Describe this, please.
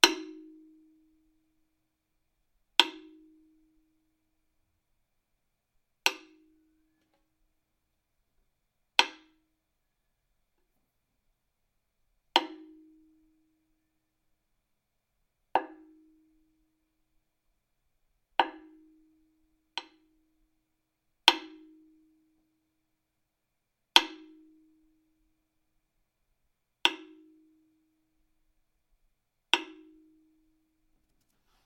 repinique-rod
A repinique (samba drum), hit on the tuning rod with a wooden stick.
Recording hardware: Apogee One, built-in microphone
Recording software: Audacity
drum, drums, percussion, samba